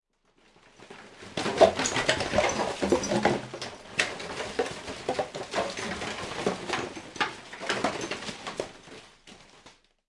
plastic rubbish falling stairs 02
A collection of plastic trash falling down a surface as a foley for my documentary "Plastic Paradox"
Recorded with NTG-4, Mono.
bottle, bounce, container, dispose, drink, drop, fall, falling, foley, garbage, PET, plastic, plastics, rubbish